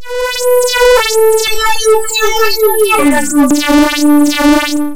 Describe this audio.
Create a new audio track.
Generate > 5 Tone with parameters :
Sine, 261Hz, amplitude : 1, 5s
From 0.00s to 0.50s of the track, apply Fade In effect.
From 4.50s to the end of the track, apply Fade Out effect.
From 0.55s to 0.76s, apply a Phaser effect with parameters :
Stages : 7, LFO (Hz):2.4, LFO (Deg):0,Depth:226,Feedback:-100%
From 1.25s to 2s, apply a Phaser effect with parameters :
Stages : 17, LFO (Hz):3.6, LFO (Deg):340,Depth:255,Feedback:-90%
Change tempo -> Percent Change : 113.60
Change pitch -> From E to F;Semitones:24.59;From 245 To 1014.100;Percent Change:313.91;
Normalize.
Audacity, Phaser, Pitch, Sine, Tempo